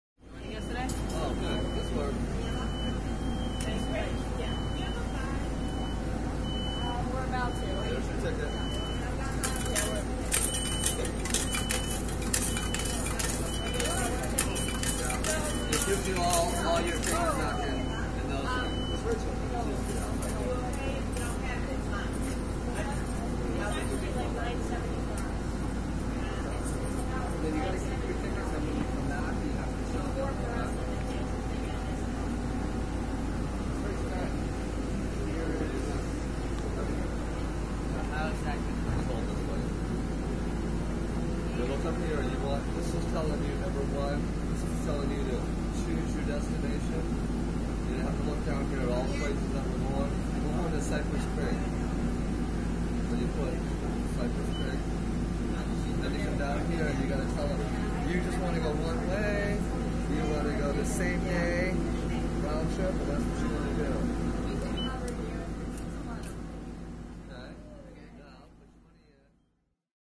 a ticket station1
speech, money, beeps, motors, air-breaks, coins